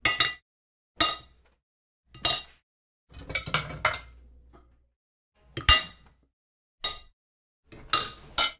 cutlery utensil spoon fork knife down plate nice detail roomy